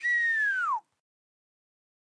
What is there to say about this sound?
Whistle down voice fx temperature thermometer
A whistle going down expressing a thermometer temperature lowering
Voice FX
down, fx, temperature, thermometer, voice, Whistle